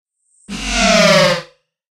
PUT ON THE BRAKES-2 !. Outer world sound effect produced using the excellent 'KtGranulator' vst effect by Koen of smartelectronix.
horror, sci-fi, effect, processed, sound-effect, sound, fx